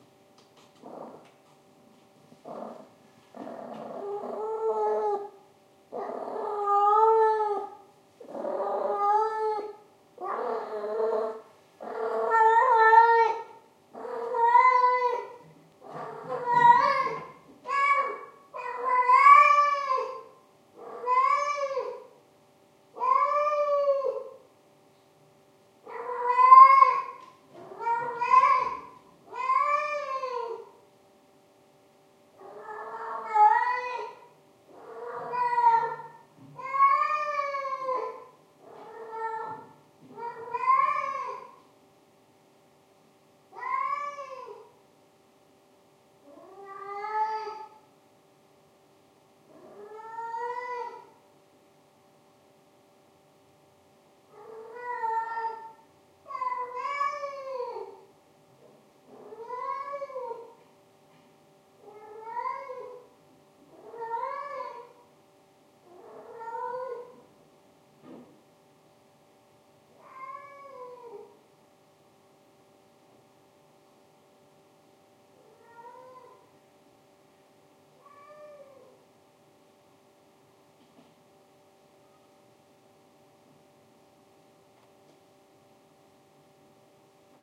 My flatmate's cat in heat, first time in her life, obviously crazy in love and emotionally confused... She is running up and down the staircase from door to door, but with no luck.
disclaimer: no cat was hurt in the recording of this sound ;)
Recorded with Sony PCM D50 with built in mikes
cat, miau, scream, meow, whine, cry, heat